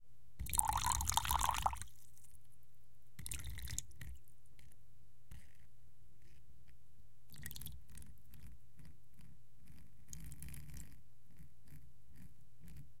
Pouring Water 02
Someone pouring water.
Pouring, liquid, water, pour